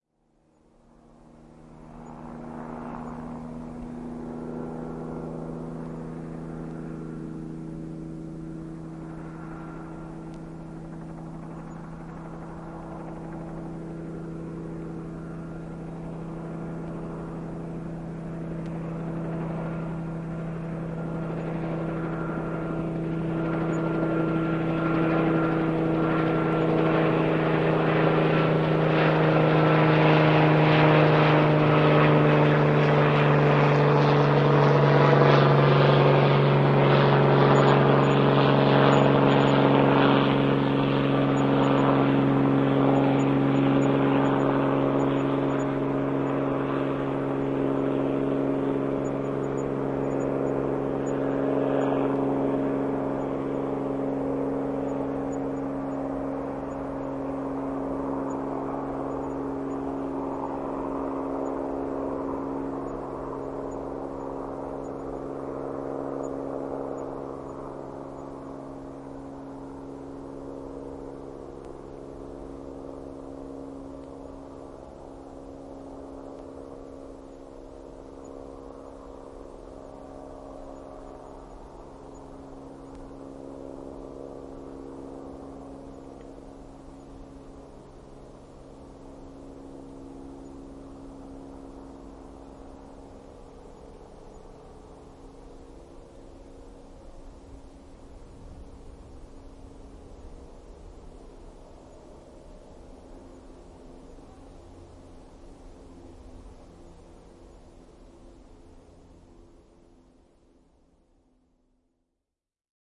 Helikopteri, ylilento / A helicopter, low overflight (Bell 47G2 OH-MIG)
Helikopteri lähestyy, lentää yli matalalla, etääntyy. (Bell 47G2 OH-MIG).
Paikka/Place: Suomi / Finland
Aika/Date: 23.03.1972
Yle, Ylilento, Finland, Helikopteri, Air-travel, Finnish-Broadcasting-Company, Soundfx, Yleisradio, Aviation, Ilmailu, Helicopter, Tehosteet, Field-Recording, Overflight, Suomi